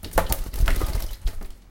Sherry - Kitchen Water Sounds - B
My bird was sitting in some water in the kitchen when it jumped out onto the counter and made this atmosphere. Audacity says this one is B. This sample was recorded at 11 am, you can hear water droplets if you listen closely enough.
Animals, Bird, Canada, Forest, Kitchen, Morning, Nature, Quale, Sherry, Water